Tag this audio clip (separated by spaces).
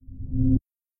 bleep blip button click event fi flourish game gui sci sfx short